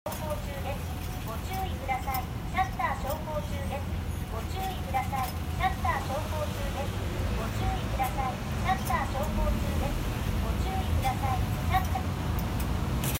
"シャッター昇降中です ご注意ください"
"Please note that the shutter is moving up and down."
A building in Japan closes its shutters, and a pre-recorded female voice alerts anyone nearby to take notice.